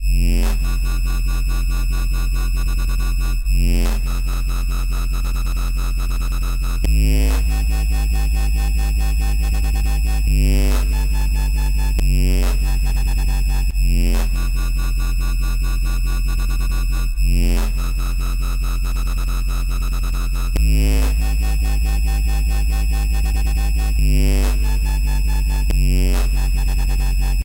dubstep wobble md 140bpm
A nice little wobble. Have fun with it!
crushed, wobble, pass, 140, LFO, bit, dubstep, bpm, filter, low, bass, dark